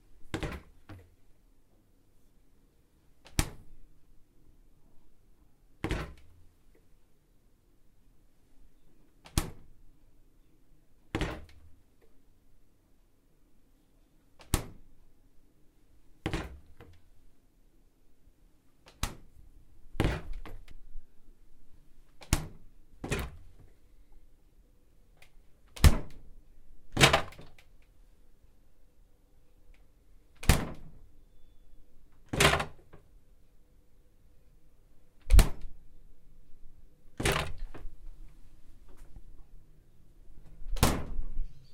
Fridge opening and closing, progressively more violent towards the end.